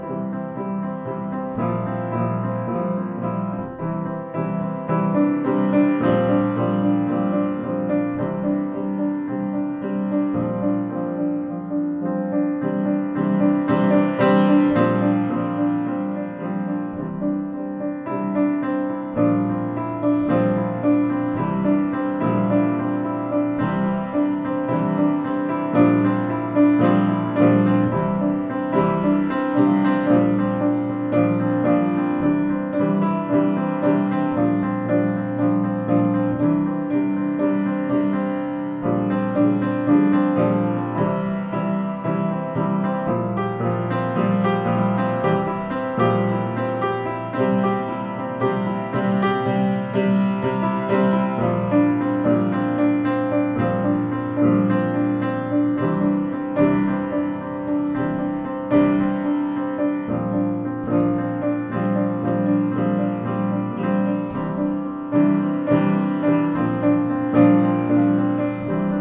Piano Improv
Part of an improv session on the Steinway Baby Grand piano at my house. Recorded with a Tascam DR-40.